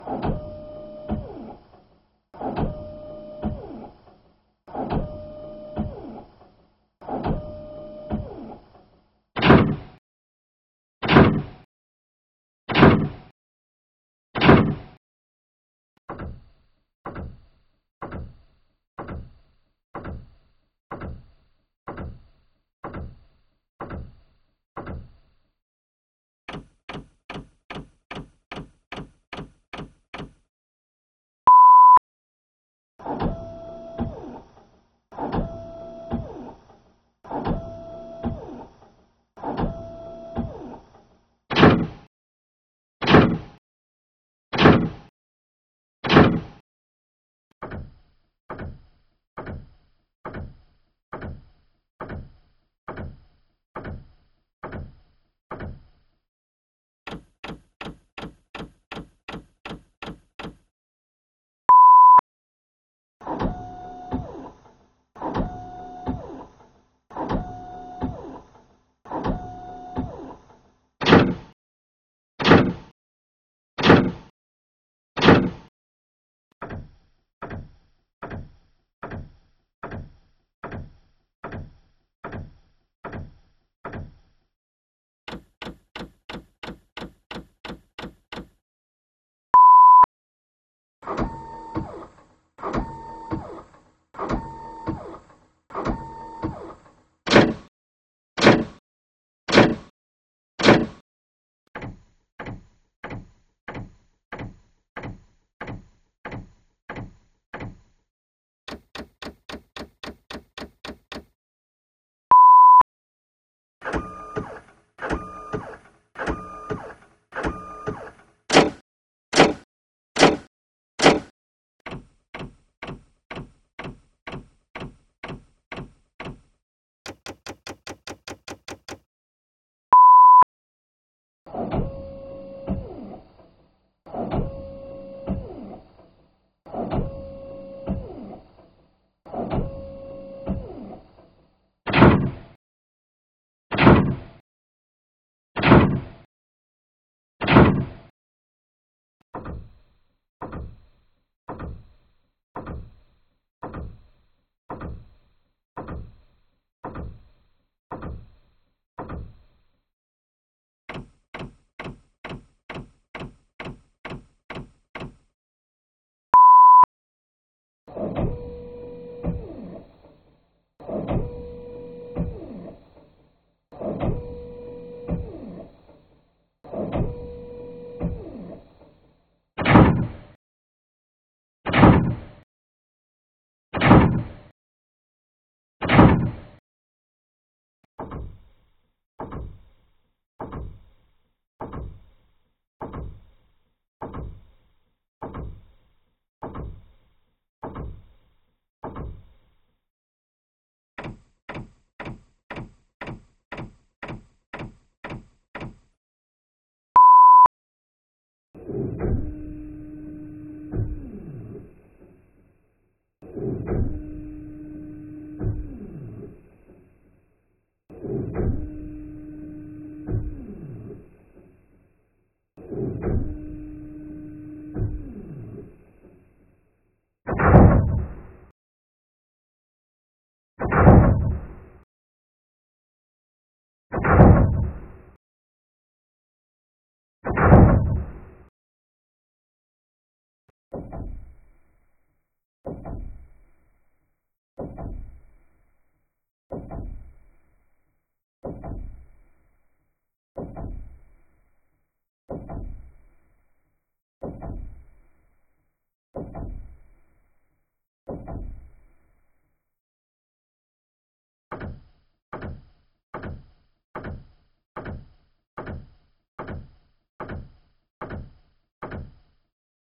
I have used 4 of my edited printer sounds (eps002,005,006 and 011) to create the sound of a robot walking at various paces
Speed of takes:
1: normal
2: 10% faster
3: 20% faster
4: 50% faster
5: 100% faster
6: 10% slower
7: 20% slower
8: 50% slower
Made in Audacity.